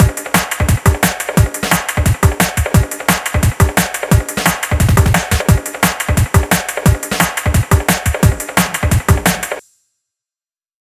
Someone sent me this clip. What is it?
fs drum loop 175
dnb, drum, loops